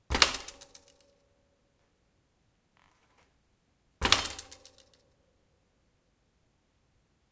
bike gear shift
Shifting gears on mountain bike
gear, bicycle, bike, shift